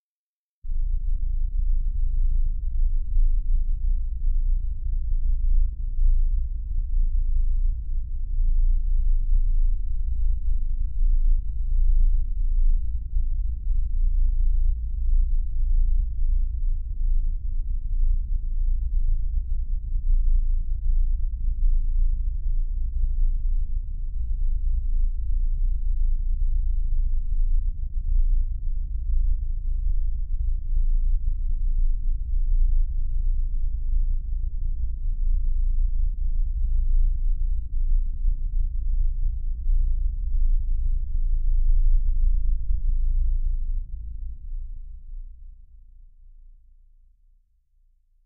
spaceship rumble bg3
made with vst instrument albino
rumble, fx, hover, soundscape, machine, dark, sci-fi, deep, electronic, energy, noise, emergency, background, pad, ambience, starship, engine, drone, impulsion, drive, futuristic, future, ambient, bridge, sound-design, atmosphere, Room, spaceship, effect, space